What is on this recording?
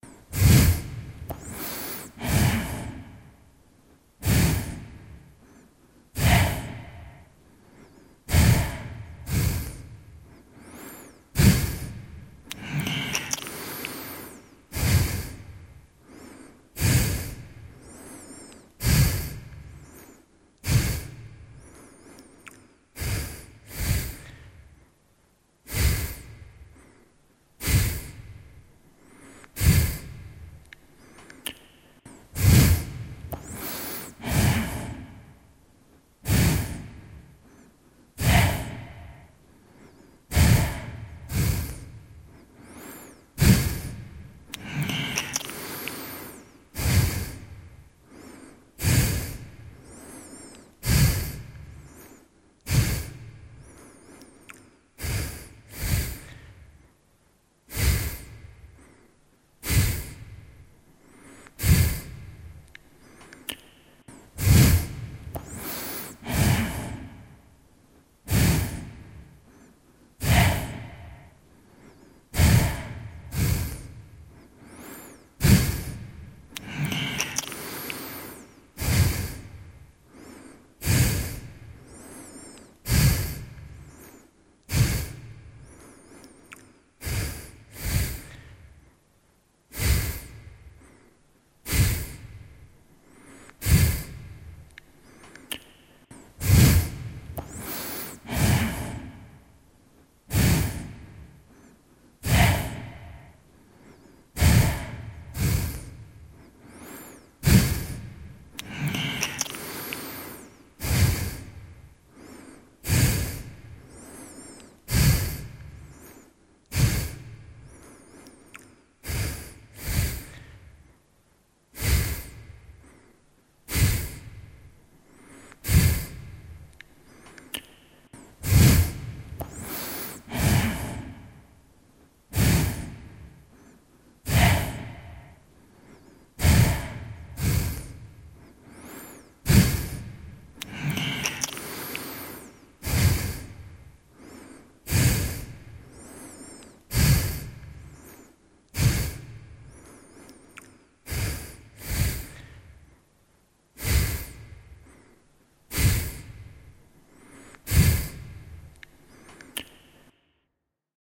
One of the "Bull" sounds I used in one play in my theatre.
Bull breathing heavily.
bull, breathing, breath, monster